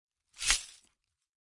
Broken glass contained in a felt cloth. Wrapped up and shaken. Close miked with Rode NT-5s in X-Y configuration. Trimmed, DC removed, and normalized to -6 dB.